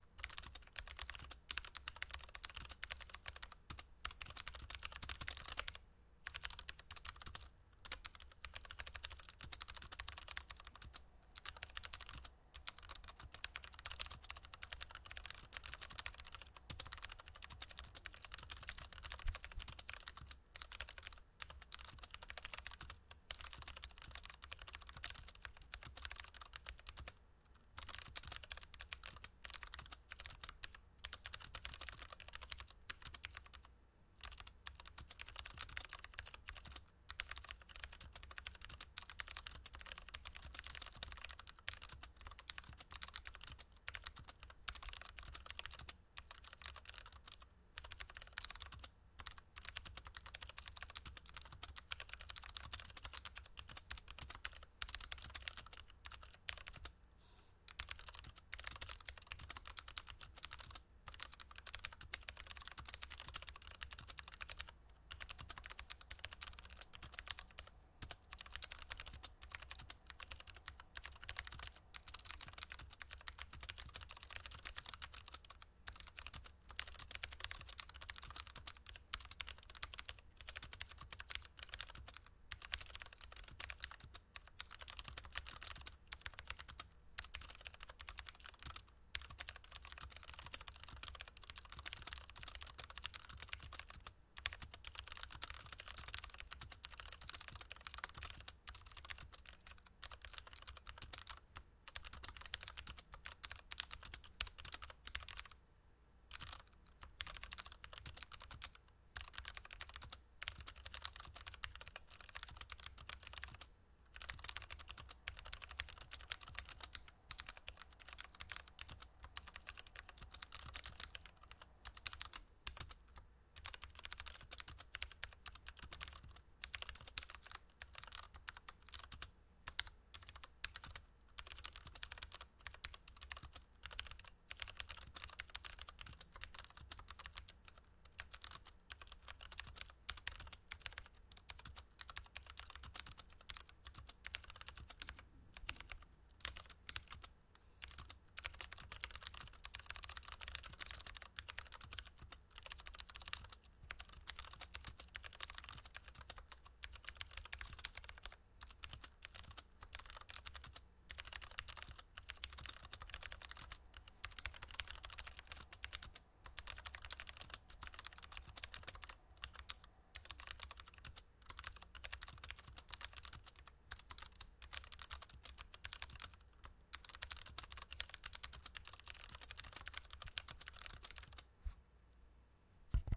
Mechanical Keyboard Typing (Treble Version)
Typing on a mechanical keyboard (Leopold FC660M, mx browns, enjoypbt and gmk caps)
This one is the more trebley of the two recorded using my keyboard.
Recorded with a ZOOM HD1 placed on a wrist pad in between my hands, in front of the keyboard's spacebar.
keyboard, mechanical, typing